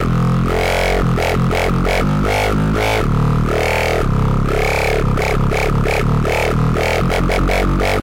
Dubstep Wobble 120BPM
A dubstep wobble loop.
wobble, basic, synthesizer, skrillex, 120bpm, heavy, loop, dirty, wub, bass, dubstep, LFO, bassline